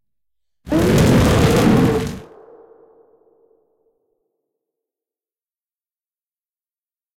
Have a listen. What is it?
Dragon Roar
Scream, Breath, Whoosh, Roar, Monster, Fire, Dragon